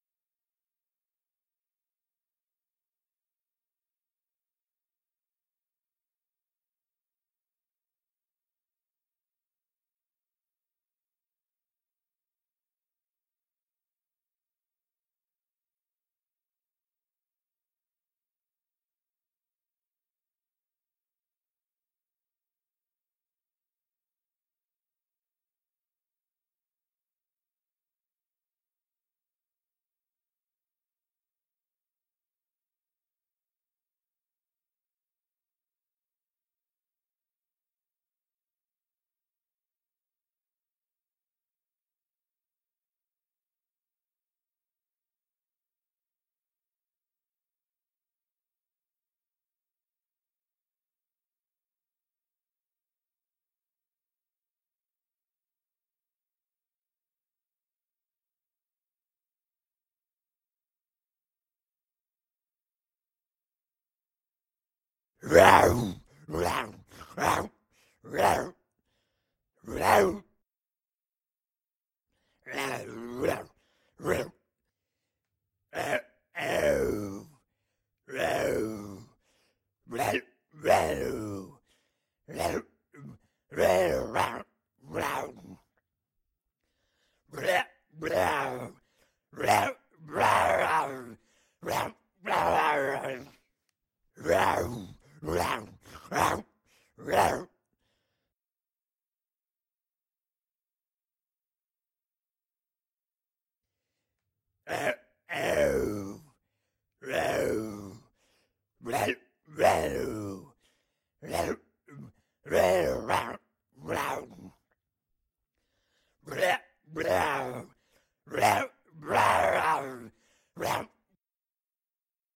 Solo Zombie 7
Single groaning zombie. Syncs at 08.24.14.
monster, zombie, undead, horror, solo, dead-season, voice, groan